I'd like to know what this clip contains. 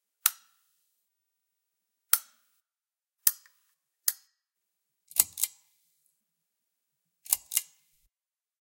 Recording of three different lamps turning on and off.